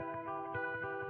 electric guitar certainly not the best sample, by can save your life.